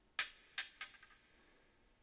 arrow clatter
a wooden dowel clattering on a stone surface (like an arrow shot into a cave)
arrow, clatter